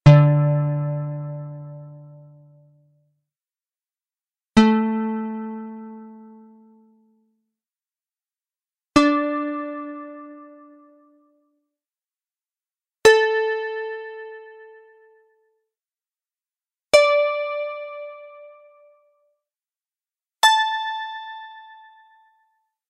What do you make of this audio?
The Electric Harp was made popular in the late seventies by a swiss musician named
Andreas Vollenweider. The Harp,he is playing,is unique and was built by him and his father. I tried to create this unique sound with my Waldorf Blofeld . And I sampled it with Roland Fantom,using Chorus and Pan.
Harp, Plucked